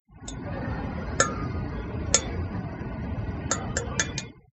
este audio hace parte del foley de "the Elephant's dream"
metal, walk, step